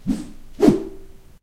Double Whoosh
I use a bamboo stick to generate some wind *swash* sounds. I used following bamboo stick:
Find more similar sounds in the bamboo stick swosh, whoosh, whosh, swhoosh... sounds pack.
This recording was made with a Zoom H2.
air, attack, bamboo, cut, domain, flup, h2, luft, public, punch, stick, swash, swhish, swing, swish, swoosh, swosh, weapon, whip, whoosh, wind, wisch, wish, woosh, zoom, zoom-h2